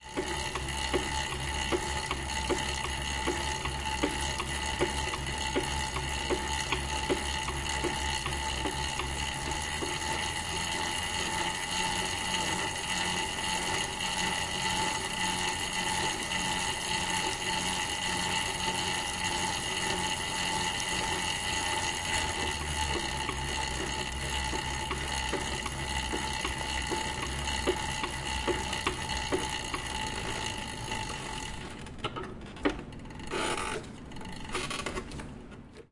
Stationary Bike
Elaine, Field-RecordingPoint, Koontz, Park, University